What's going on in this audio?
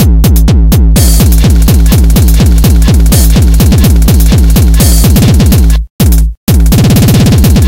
250bpm hc
simple, straightforward hardcore/speedcore loop done in hammerhead